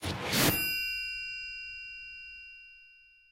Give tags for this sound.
bell chime star

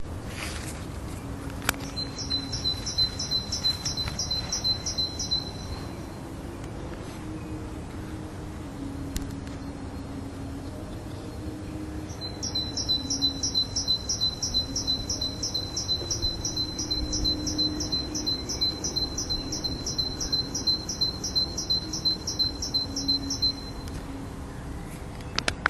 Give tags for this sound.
bird bird-song field-recording israel tit

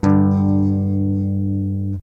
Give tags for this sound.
chord electric guitar strum

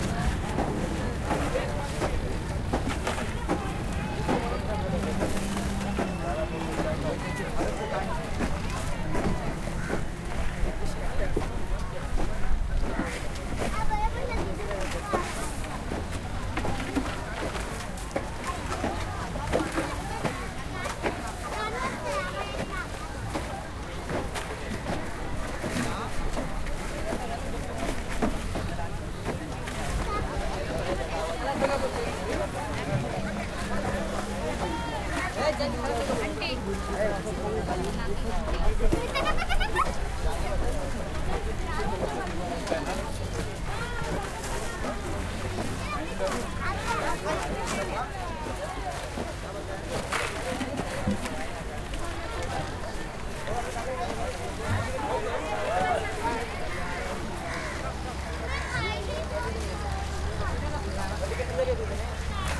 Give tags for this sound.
active busy cooler digging ext fish ice India market

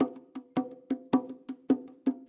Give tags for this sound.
drum bongo percussion

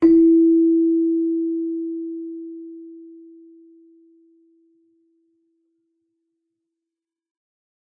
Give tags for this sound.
bell celesta chimes keyboard

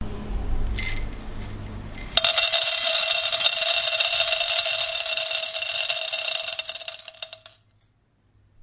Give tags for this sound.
bean falling rain

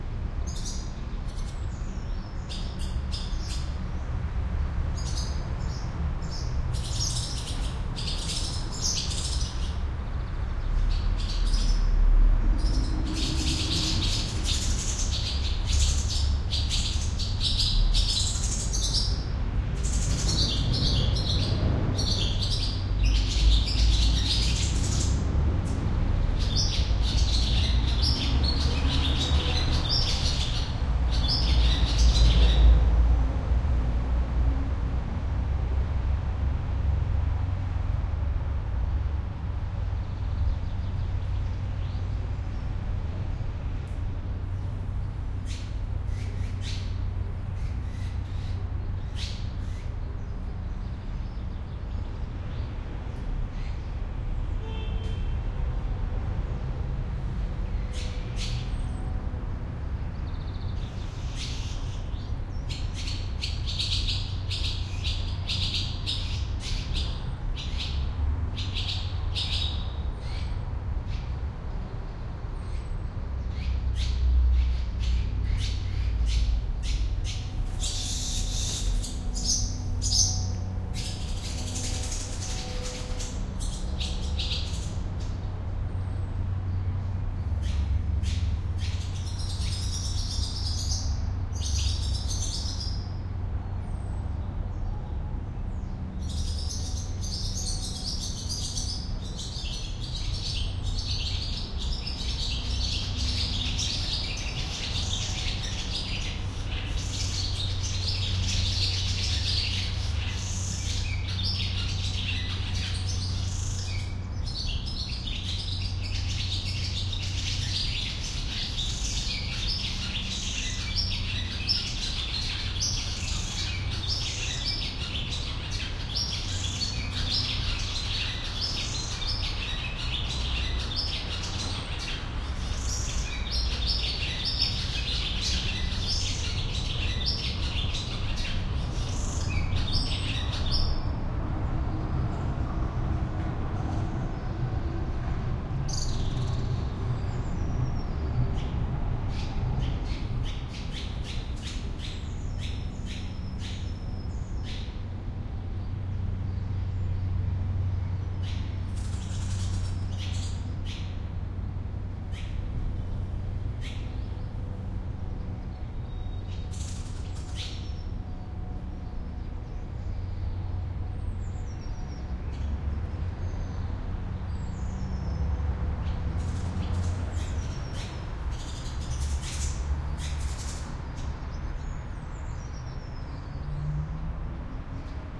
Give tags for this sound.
swallows spring

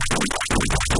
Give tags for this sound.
image percussion soundscape synth

